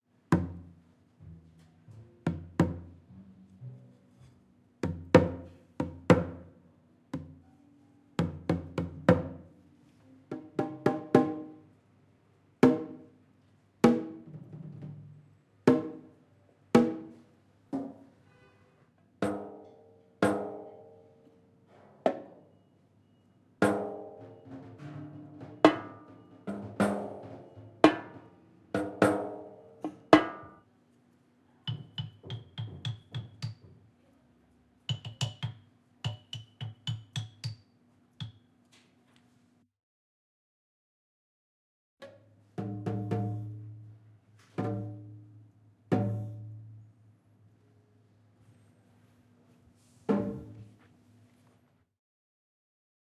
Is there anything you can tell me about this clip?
Playing various Asian drums in a Tokyo drum museum. Recorded on a Zoom H4 in June 2008. Light eq and compression added in Ableton Live. Some single hits and rhythms.